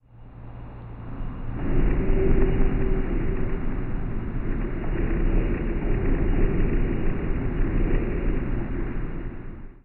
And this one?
slow grind
recording of an old fan with a with a bearing gone. edited in Audition. Recorded on an Edirol E9
grind, scraping, grinding, scrape